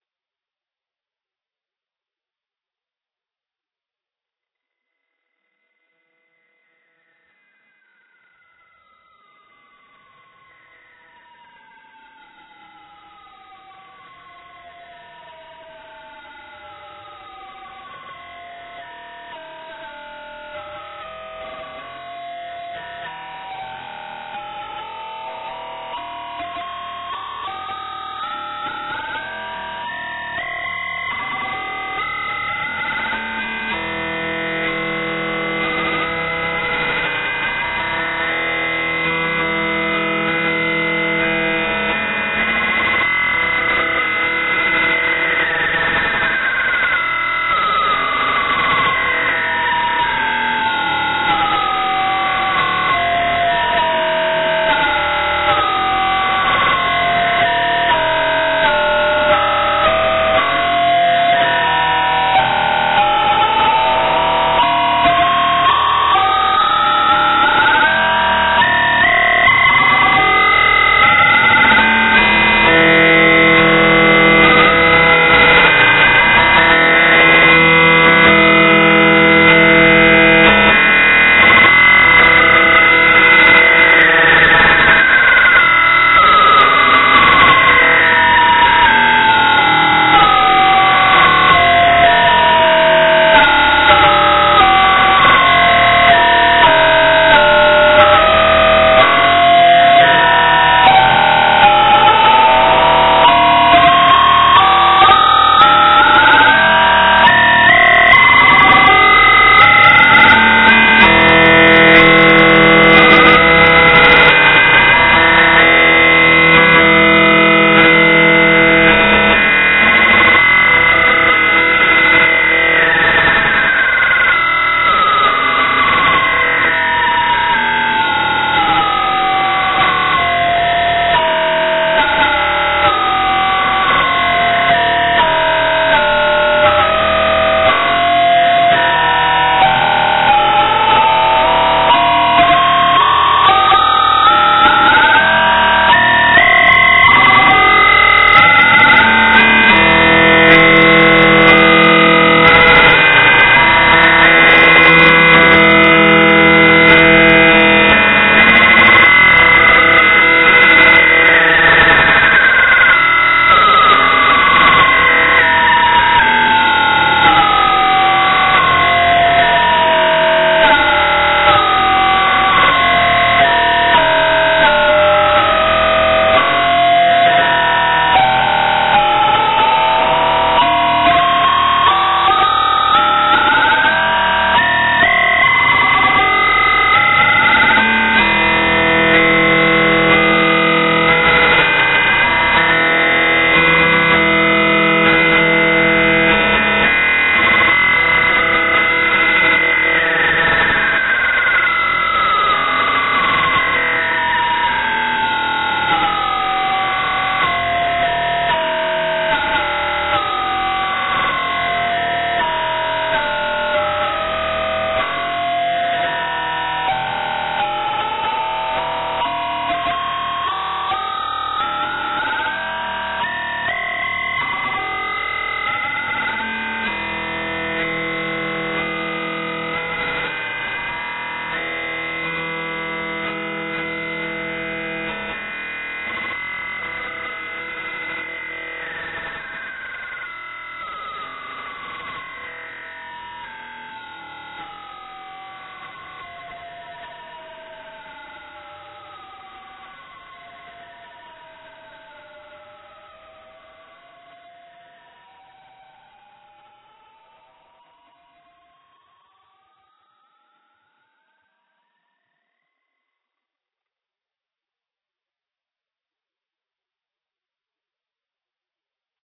a scrambled concoction of a typical morning after (a resounding morning after full English), fed through an AM radio, sampled, looped, forgotten, renounced and abandoned.
adios in other words....